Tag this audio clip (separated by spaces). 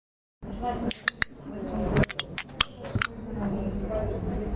beverage can drink satisfying soda tin-can